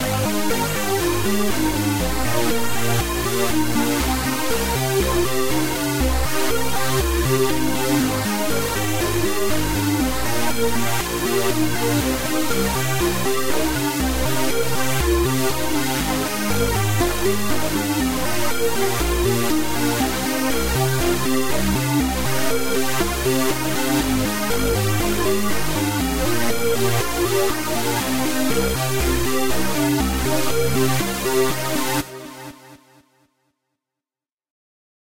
Bass + Lead loop made with Serum. The Bass is a Sawtooth sound that has it's pitch modulated by 1 octave. The lead is a Square lead with it's wavetable modulated so that it drifts between Square and Pulse wave types. Some minor distortion, hyper dimension, and delay were added as well.
I like seeing how these are used :D